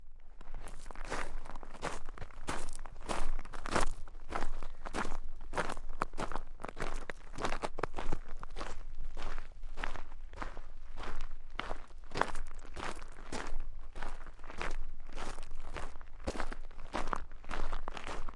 Human Walking On Gravel 02
I recorded myself walking on a coarse gravel driveway at a moderate pace.